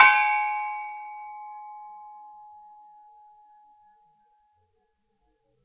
These are sounds made by hitting gas bottles (Helium, Nitrous Oxide, Oxygen etc) in a Hospital in Kent, England.
percussion, hospital, metal, gas, gong, bottle